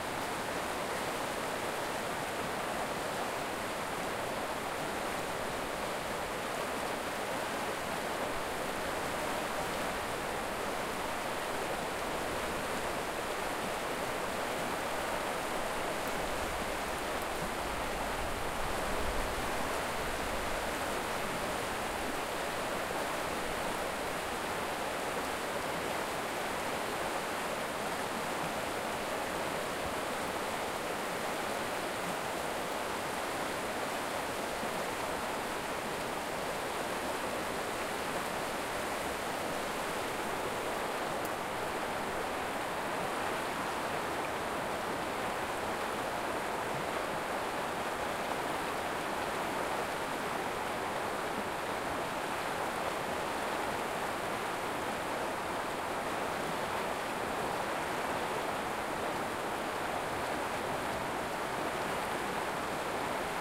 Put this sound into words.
SUBURB RIVER AMBIENCE 01
A river in a suburb closely recorded with a Tascam DR-40